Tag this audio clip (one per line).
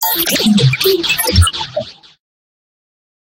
synth
strange